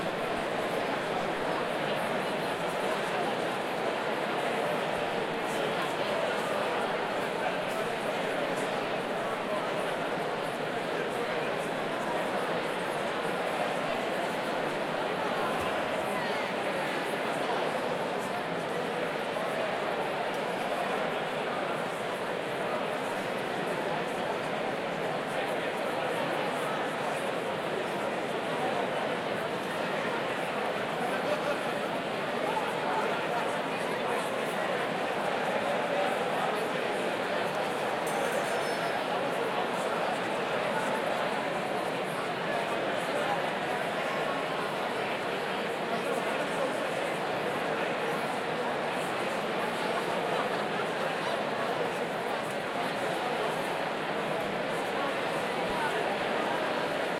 Large crowd medium distance stereo
A clean raw stereo recording of a about a thousand people chatting with each other. Almost no distinct dialogue. Recorded in stereo on an H4n. The microphone was positioned on the side of the crowd, close but not in the crowd. Recorded in a big space with huge ceilings--would be suitable for a theatre, auditorium, rally, sports game, etc., but an experienced editor might be able to make this into an outdoor scene as well. There might be minimal handling noise.